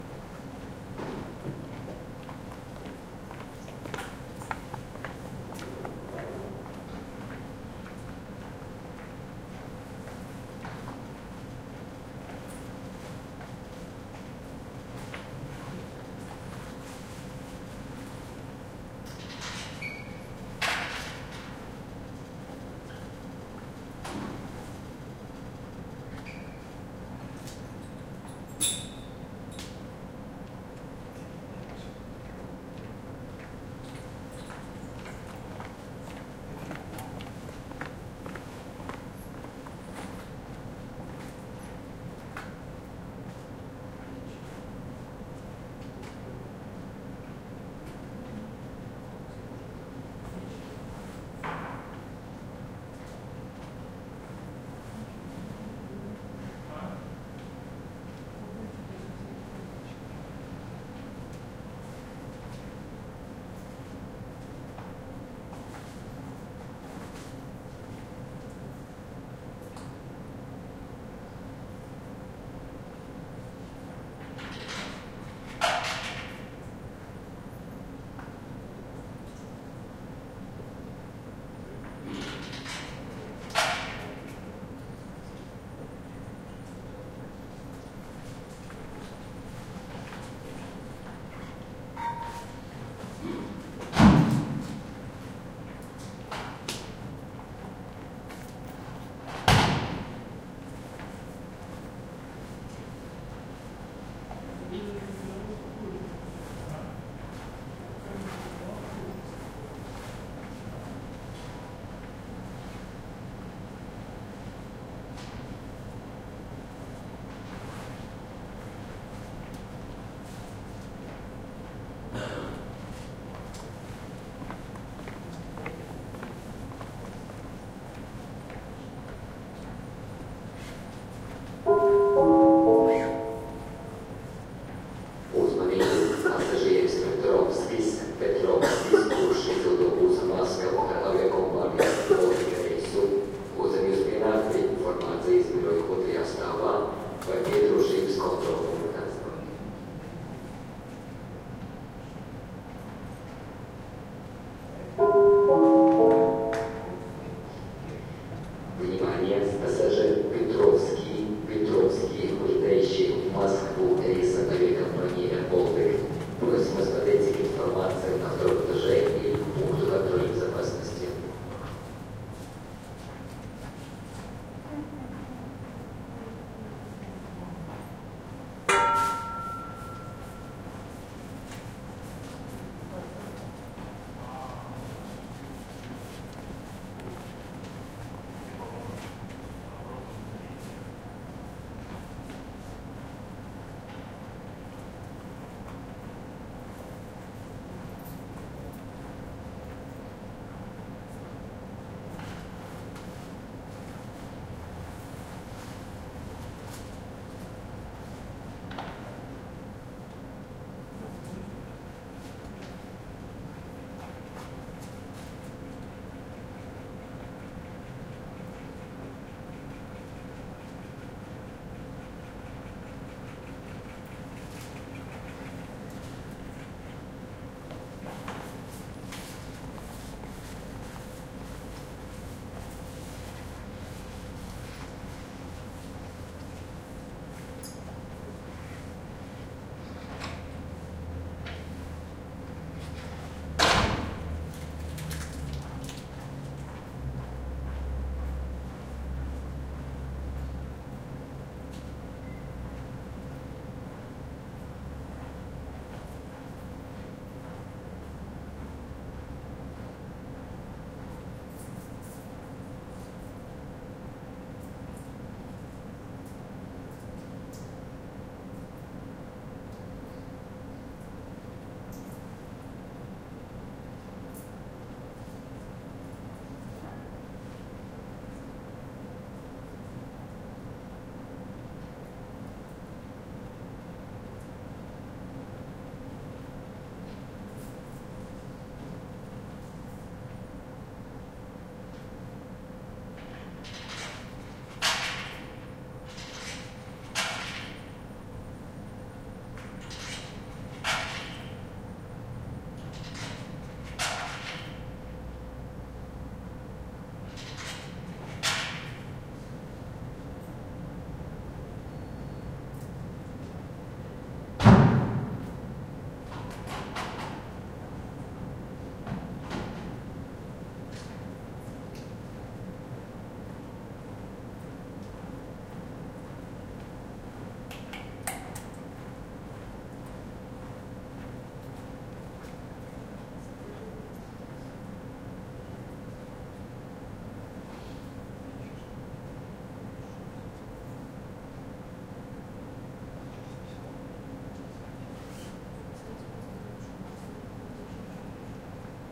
Riga International Airport, departure gate C4 XY 23.02.16
Waiting for a departure at the gate C4 of Riga's International Airport.
Made with Roland R-26's XY mics.
Airport, ambience, Atmosphere, Field-Recording, Latvia, People, Riga